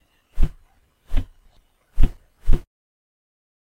Slightly steping on the floor
Shoes, Light, Steps, Floor